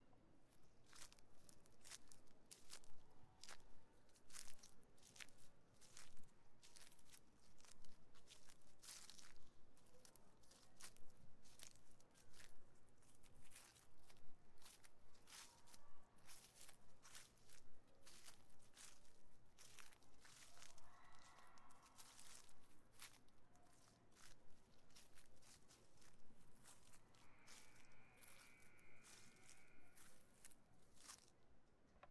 Stomping some leaves.